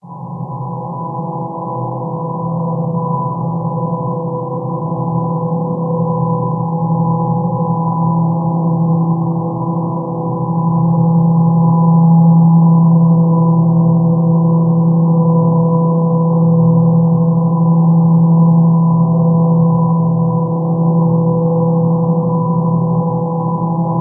wind ambient synth
small ambient drone
nothing special to tell here about it :)
ambient, drone, noise, synth, white, wind